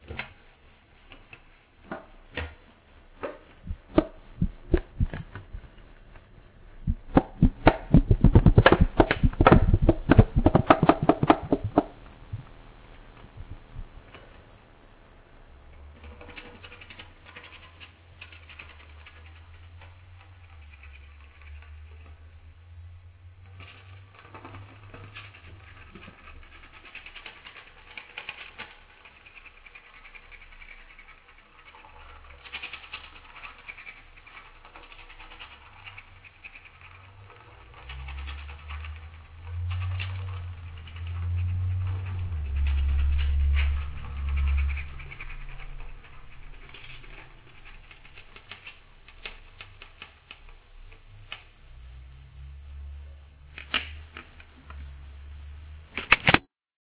Scrapping and Bending Cheap Alu PROCESSED
Scrapping and Bending Cheap Alu.
Exactly, its the cap of a cheap alluminium Box,
Scrapped over with a metallic Brush full of Cat's Hair. lol
Pure spontaneous improvisation